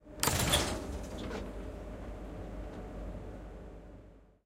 train door open 1a
The sound of a pneumatic door opening on a typical EMU train. Recorded with the Zoom H6 XY Module.
mechanical, emu, sliding, open, door, close, opening, pneumatic, train, closing